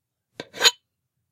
knife cutting through armor, bone weapons ext...
armor, death, fight, flesh, gore, kill, knife, sword, vegetable, violence